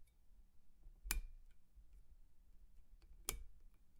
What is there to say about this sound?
TurningLampOn/Off
Click, House, Lamp